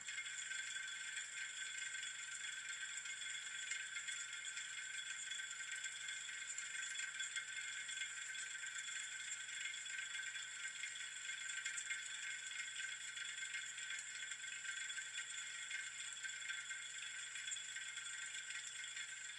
Aluminum Exhaust Fan
Exhaust fan, spinning medium speed.